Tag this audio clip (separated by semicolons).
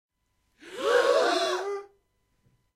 air; breath; shock; shocked; suspense; tension